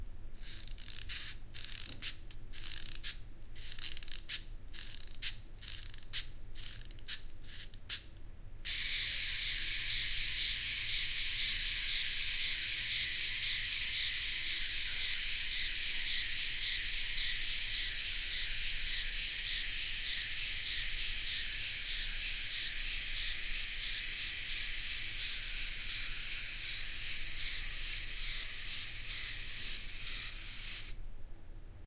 an obscene windup toy: the monkey pleasures itself when wound up. a crazy toy from some crazy friends, found in a Chinese shop. recorded with binaurals.